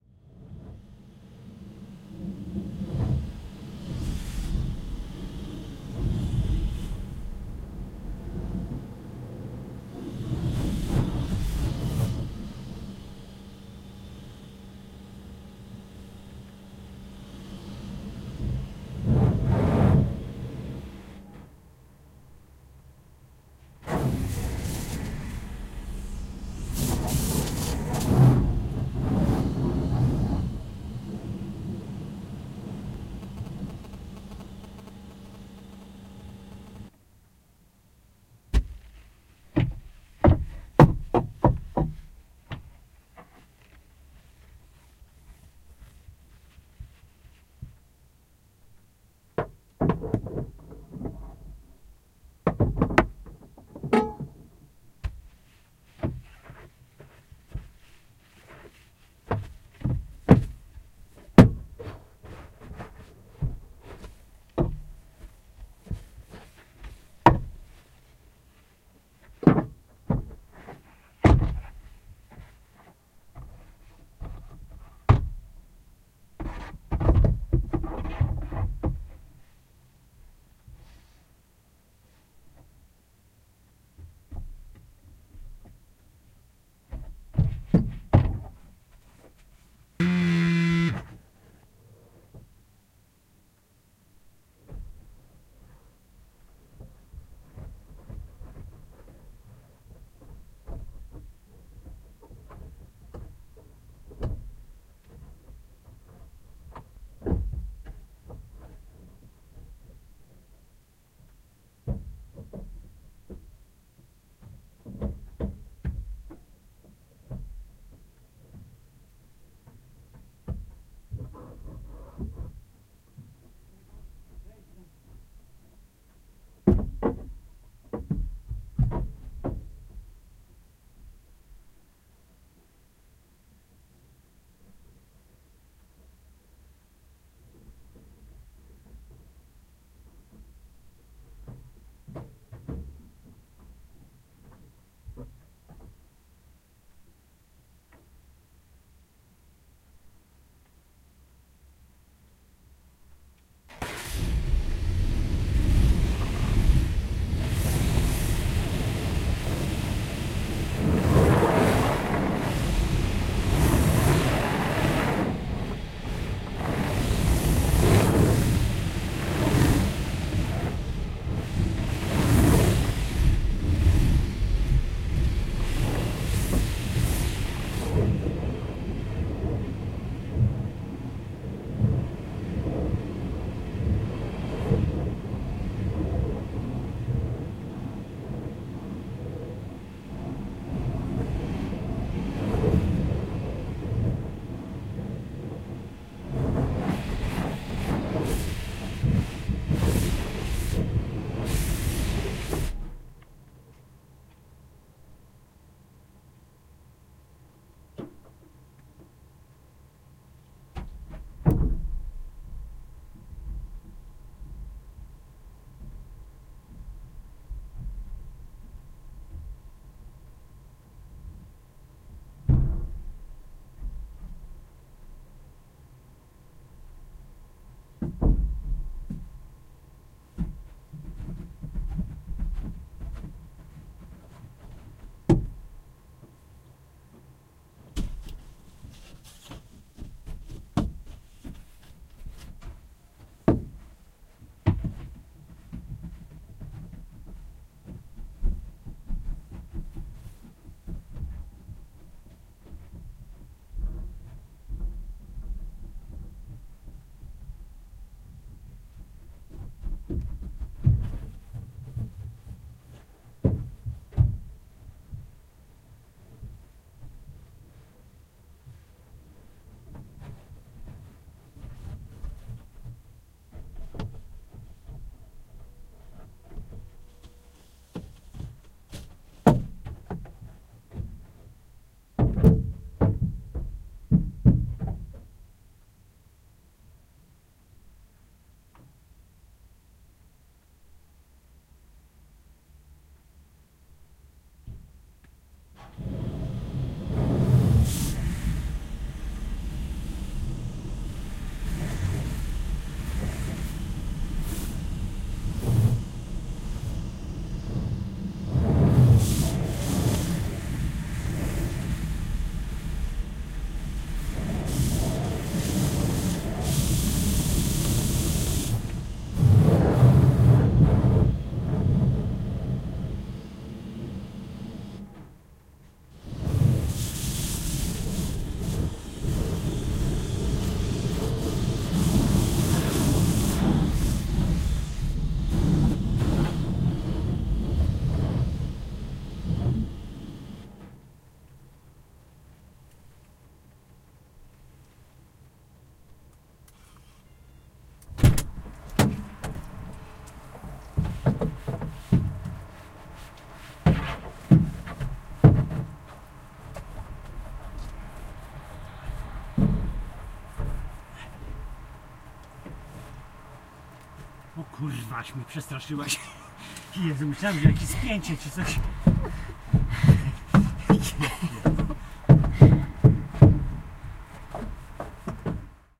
110819-cleaning horse
19.08.2011: twentieth day of ethnographic research about truck drivers culture. Padborg in Denmark. The truck base. Cleaning the truck after whole route. The last day of route, the last day of work. Process of cleaning the truck using karcher. Recorded inside the truck cab. I used the file title "cleaning horse" because truck drivers with who I had been working describe trucks without caravans as a horses.
field-recording, drone, truck, cleaning, swoosh, water, washing, truck-cab, noise